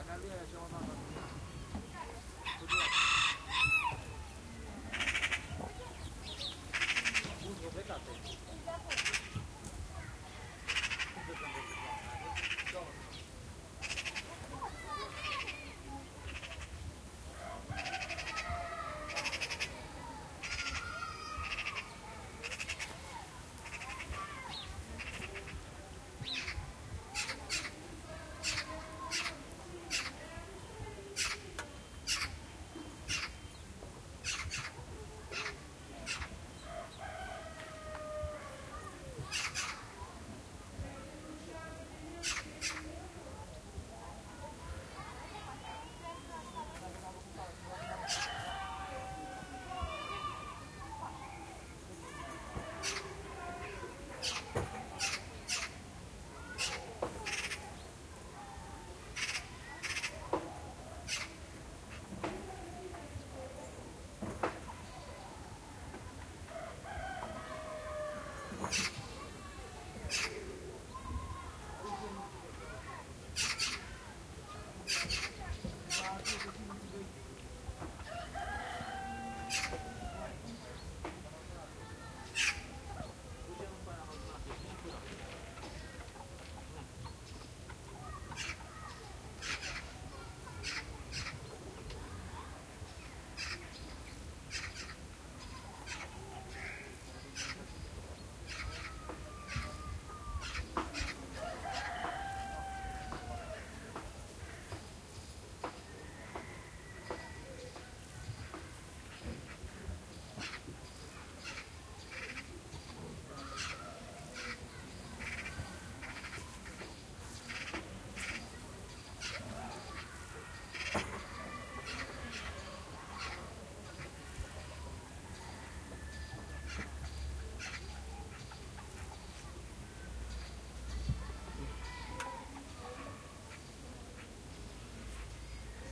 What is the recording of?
201006 Tichindeal GypStl Ioan Afternoon st
An early autumn afternoon in a settlement of Roma gypsies the Transsylvanian village of Țichindeal/Romania, basically just two mud roads with about 50 or so hovels in various states of disrepair.
The recorder is standing at the top of a hill on the outskirts of the settlement, while the villagers go about their business, talking outside and in one of the hovels near the recorder. Crows, jackdaws and the occasional rooster can be heard.
Recorded with a Rode NT-SF1 and matrixed to stereo.